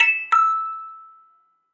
Metal cranktoy chopped for use in a sampler or something